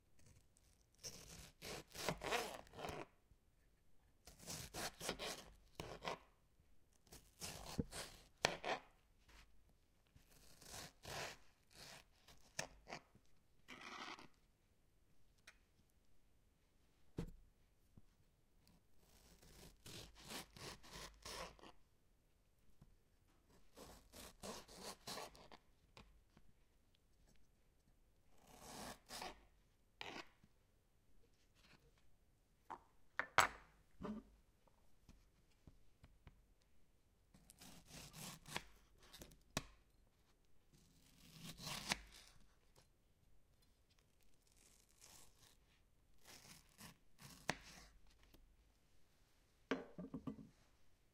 Cutting Tomato
Knife-sliced tomatoes. Recorded with Zoom H2.
Find more similar sounds in the Cutting in the Kitchen sounds pack.
This recording was made with a Zoom H2.